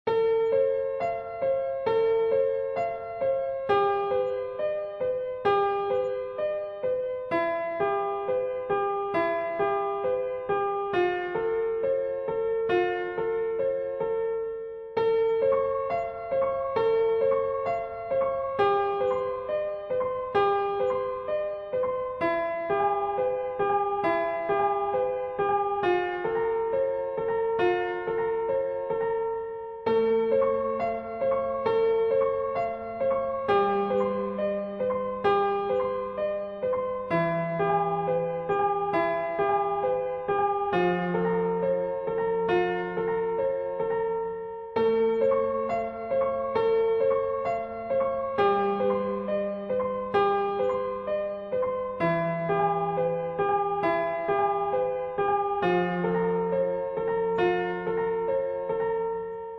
soft piano
A soft like piano small melody I made using FL and VST Piano One.
Link me stuff you used it for :D
I hope this was usefull.
detuned, old, sustain, soft, piano